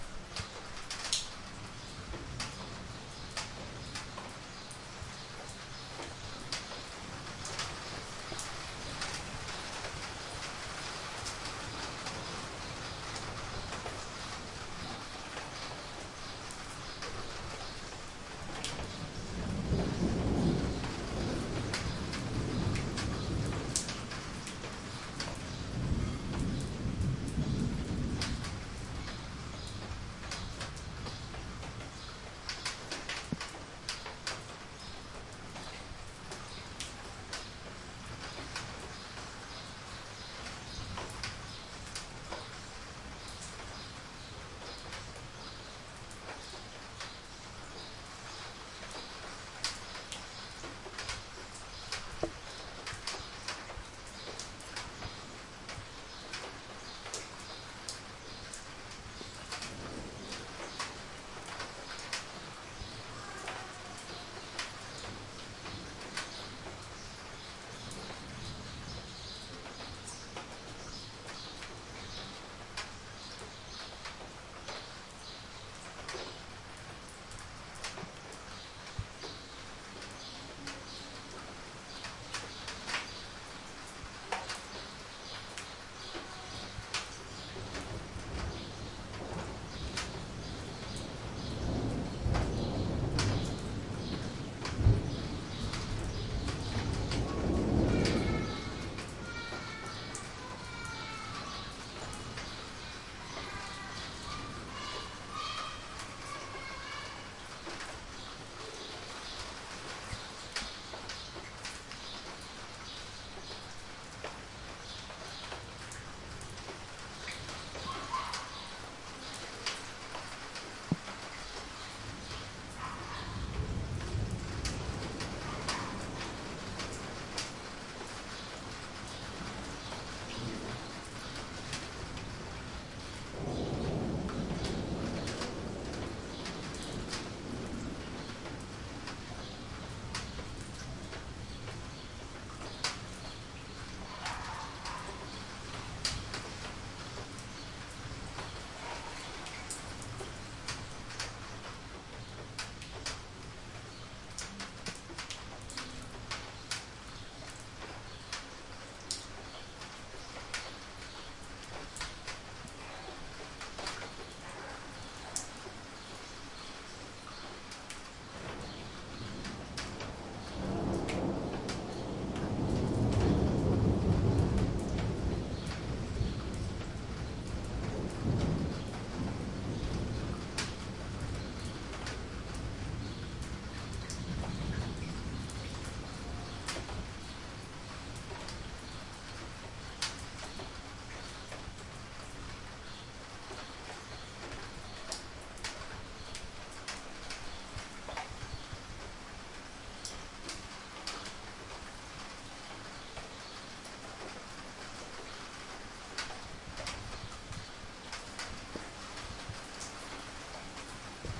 2020-06-13 Rain+Thunder
field recording rain thunder kids field-recording weather
kids, thunder, rain, weather, field-recording